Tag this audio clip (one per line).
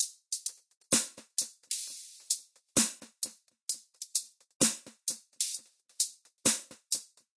loop
dub